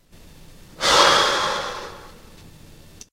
Sigh 5 Male
A young male sighing, possibly in frustration, exasperation, boredom, anger, etc.
breath, breathe, human, male, man, reaction, sigh, speech, vocal, voice